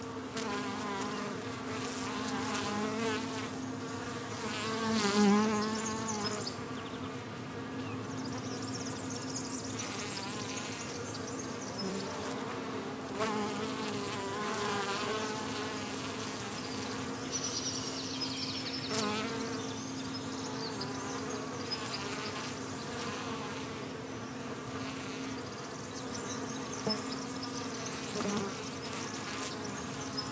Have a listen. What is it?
buzz of a group of honeybees foraging in Rosmary flowers